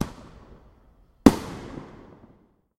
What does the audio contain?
Slightly Distant Firework
In honor of Independence Day, I decided to upload a meh (in my opinion) recording of a pretty big firework made over a year ago.
slight, explosion, boom, distant, firework